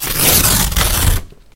ripping a paper bag
rip, paper, bag, tear